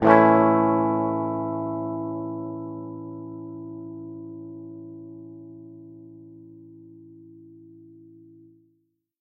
Clean A Chord
A guitar chord, probably an A.
Recorded for the purpose of testing out guitar DSP effects.
Recording details:
Gibson Les Paul Junior, P90 pickup, Mahogany neck, Ernie Ball Beefy Slinky 11-54, Dunlop 88mm.
Recorded through the instrument input of a Focusrite Saffire Pro 24.
Edited in Ableton Live, no processing other than gain and fade.
chord, clean, dry, electric, electric-guitar, guitar